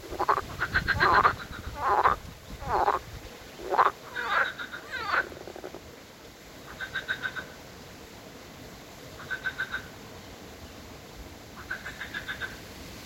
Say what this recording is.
Croaking frogs in a pond. Recorded with mobile phone.